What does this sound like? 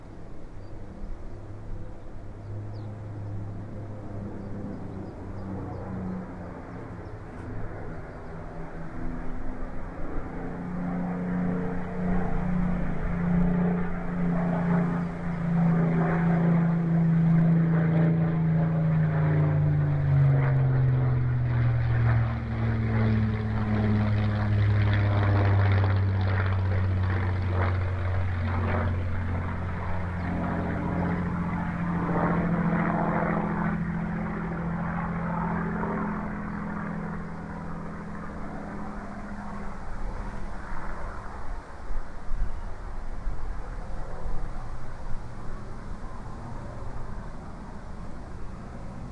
Spitfire fly by 1
Fly by of a spitfire- unfortunately there is lots of birdsong in the file-but i hope it will be use to somebody out there !
aircraft, plane